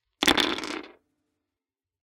Rolling dices.
{"fr":"Dés 1","desc":"Lancer de dés.","tags":"de des lancer jouer jeu"}